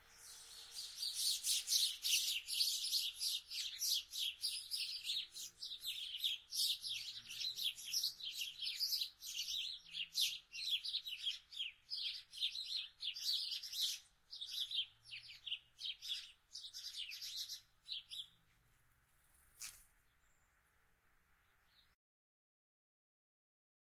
Bird chirping loudly
bird, birds, bird-sing, birdsong, bird-sound, field-recording, nature